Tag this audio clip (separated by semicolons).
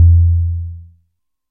mono; bongo; poly; korg; analog